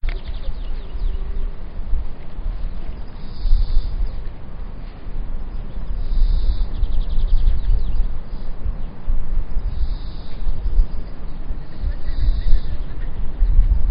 OCELLS ALS ARBRES
son ocells en els arbres cantant
park, field-recording, deltasona, trees, fondo, el-prat, peixo, del, birds